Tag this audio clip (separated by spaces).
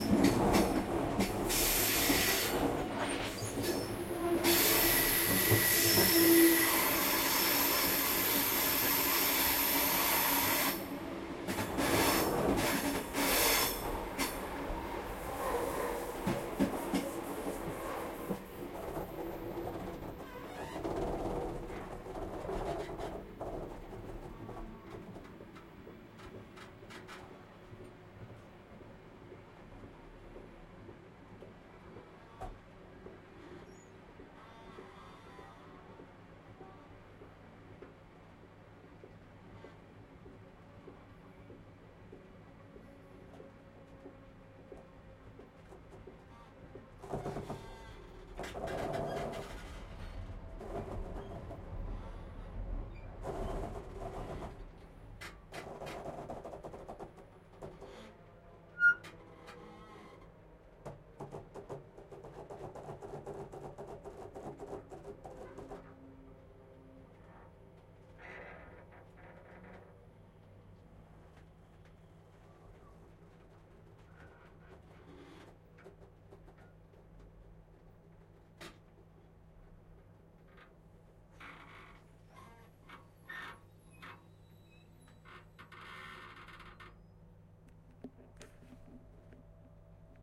car coach field-recording metal plastic railways sbb speed squeak stereo switzerland tracks train transportation waggon